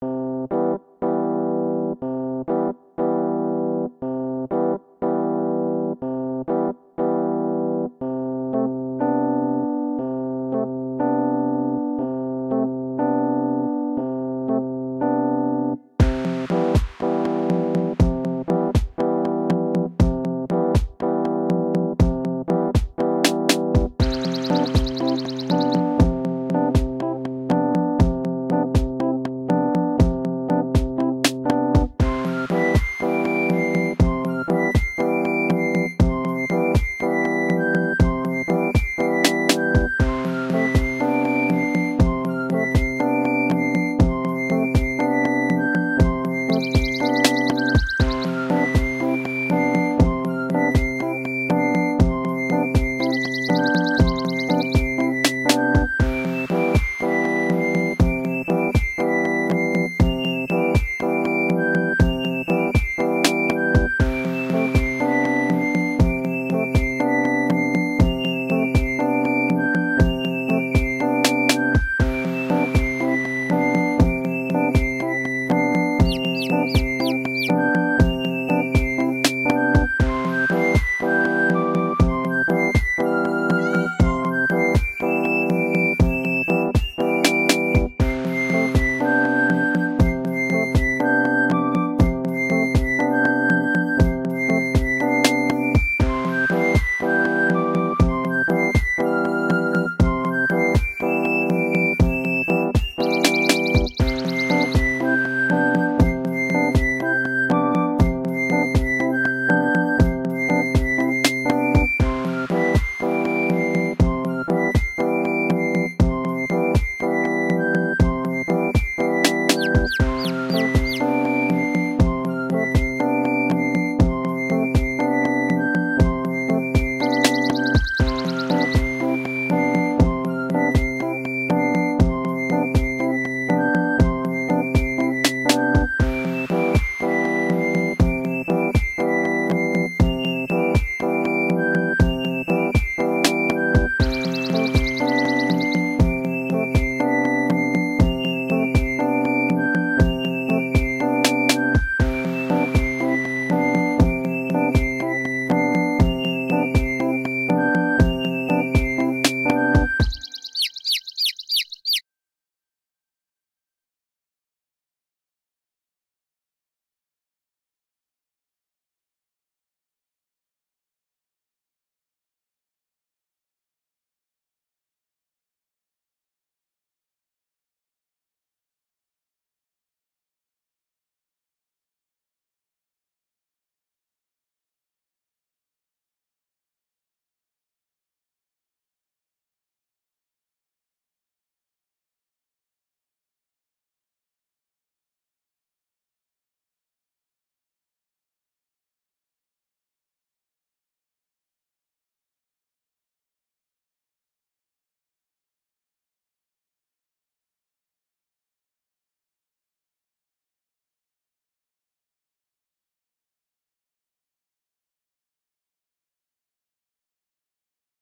Garageband-made music. Cheerful, relaxing. Great background for games, videos, animations, etc.
cheerful, peaceful, pastoral, relaxing, flute, chirping-birds, song, piano, stardew-valley, atmosphere, garageband, calming, animal-crossing, game-ambience, video-game-music, cottagecore, music